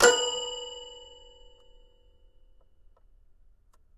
Toy records#17-B3-03
Complete Toy Piano samples. File name gives info: Toy records#02(<-number for filing)-C3(<-place on notes)-01(<-velocity 1-3...sometimes 4).
Enjoy!
toypiano, toy, hifi, samples, sample, studio, instrument